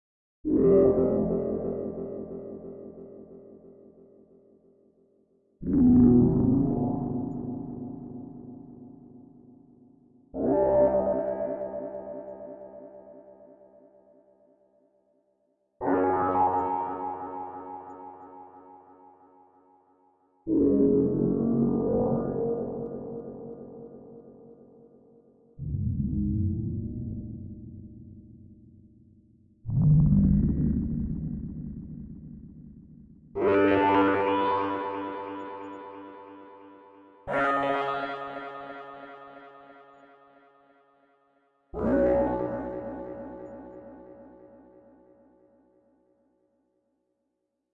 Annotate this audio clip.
A signal, a droid, a scanner, a beam. Whatever it is. It's a Bladerunner sci-fi kinda mood.